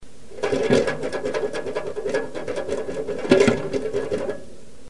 field-recording of wind in a stove pipe (different) rattling the cover
at one end (sound of wind is not heard); recorded at an old apartment
in Halifax; not processed